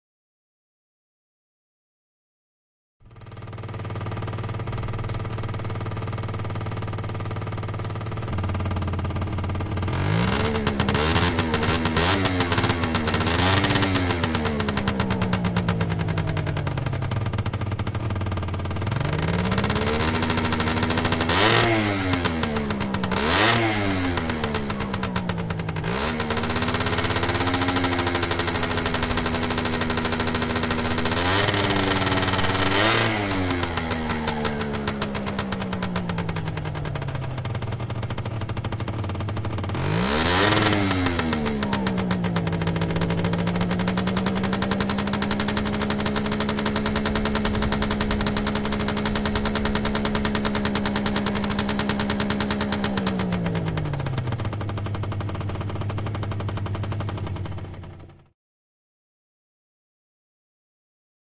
Gilera Runner 2007 revs backfire cold idle
My first ever field sound recording, made with diy mic and Sony MZ-N707 MD, sp mode, mic gain set to "low". Tommorow will make some more :)
Gilera Runner SP50, 50 ccm 2-stroke scooter, with removed factory speed-limiters (some of them are in the exhaust).
Mic was positioned maybe and inch above exhaust. At the begining automatic choke is engaged, hence the high idle revs.